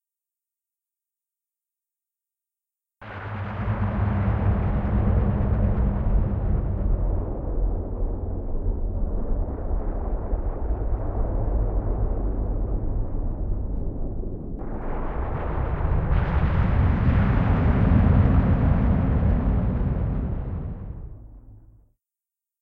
Made this with Horrorbox!
Check out Electronik Sound Lab's softwares - You can buy ESL's softwares HERE (i receive nothing)!
S/O to Electronik Sound Lab for giving me permission to publish the sounds!
Dark Scary Castle, Hall.
If you enjoyed the sound, please STAR, COMMENT, SPREAD THE WORD!🗣 It really helps!
More content Otw!